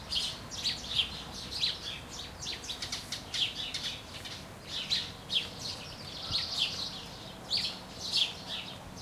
Assorted Birds
Birds chirping happily (or angrily?). Recorded with Zoom H4N and edited in Adobe Audition.
birds, various-birds